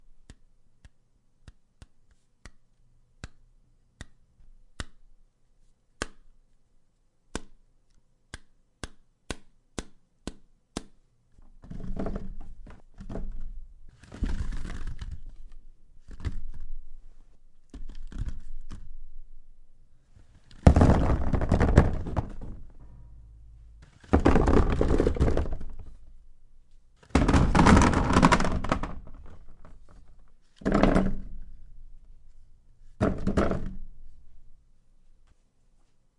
Bumping apples Pouring Apples
Bumping some apples together. Dumping or pouring about 4 pounds of apples into a plastic kitty litter bin. The first few with a towel muting the table. The others with a hollow impact sound with the table.
Recorded with AT 2020 condenser mic in adobe audition.
apple, apples, bump, dump, pour